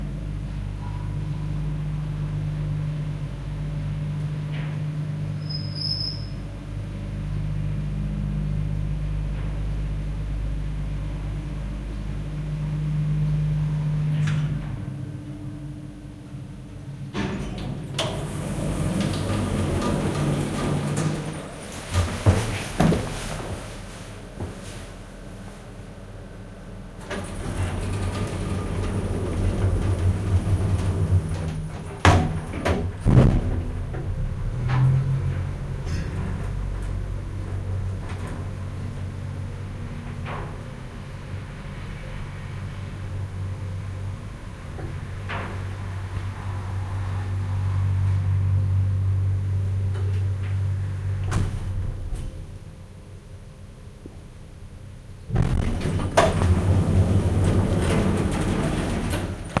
Calling elevator, doos opening, entering elevator, closing doors, elevator running.
elevator, door, lift